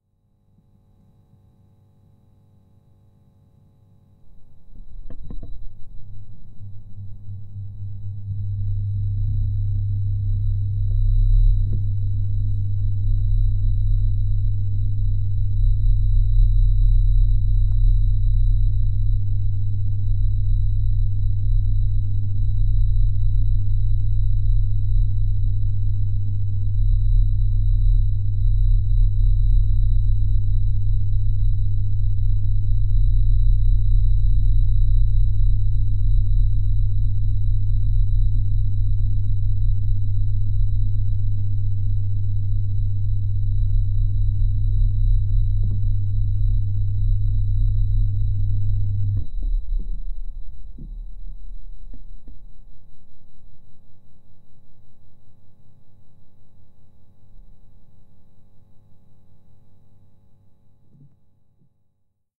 20151126 Fan On Off with Piezo 01
Recording turning the fan on and off with an acoustic-guitar piezo sensor between the fan and the floor.
industrial, whir, machinery, motor, mechanical, fan, machine